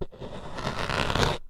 cutting carrot 1
Cutting a carrot with a chef's knife. Recorded with a Cold Gold contact mic into a Zoom H4 recorder.
food, kitchen, knife, foley, scrape